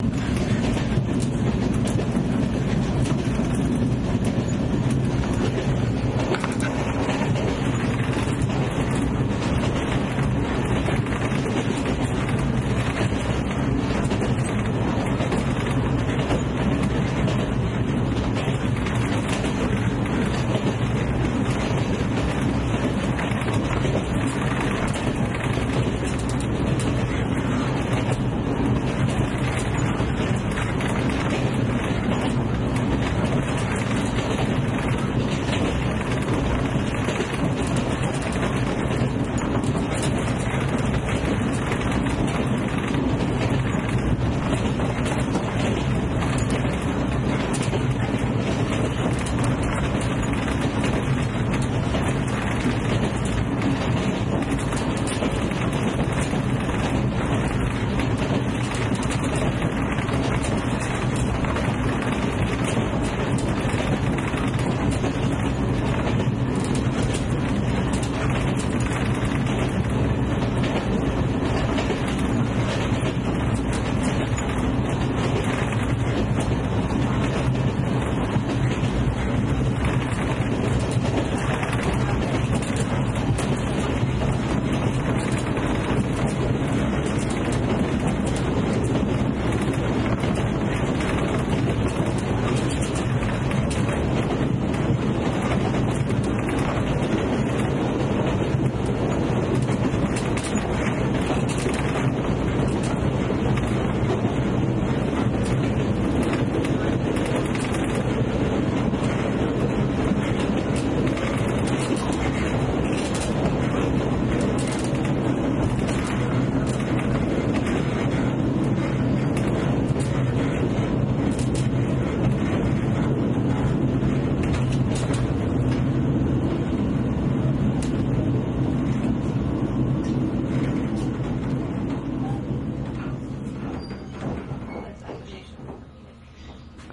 Hungarian train ride
Riding on a rattling old regional train through the Hungarian lowlands, somewhere between Budapest and Debrecen (November 2011).